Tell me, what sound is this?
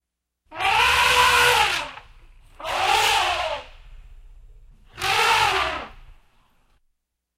Voice elephant
ethnic, elephant, dong, bell, clang, slon, ring, voice, brass, ding, chime